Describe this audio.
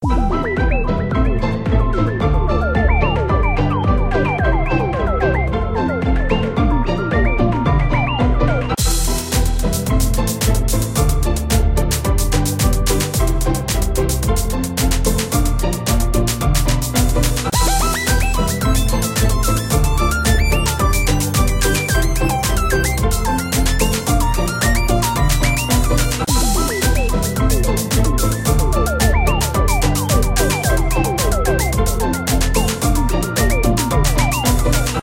Gooey Song
Test instrumental I created in Garageband with different filters and effects a few months ago on the iPad. Kicks, possibly high/low passes, some reverb maybe.
garageband, drumset, experimentation, synth, drum, loop, string